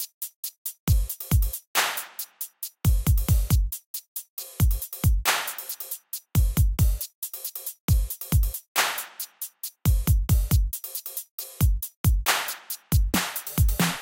On Road 32bars NO MIX
Made in FL Studio 10s FPC drum machine plugin and do not know how to, if possible apply each shot to the mixer so this is UNMIXED